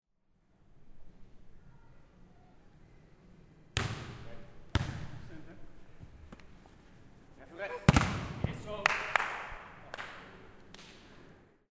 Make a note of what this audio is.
Inside recording of beachvolleyball, two teams training